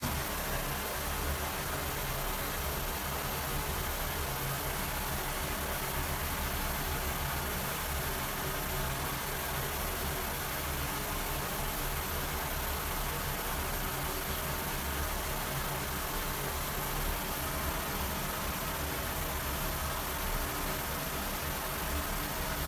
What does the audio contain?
Foley, Street, Ventilation, Hum 02
Ambience, Background, Foley, Hum, Recording, Street, Vent